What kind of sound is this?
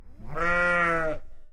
Sheep Bah
A sheep bleats in a field.
Sheep, Field